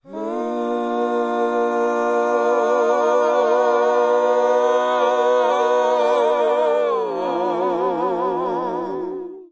Me Singing Ahh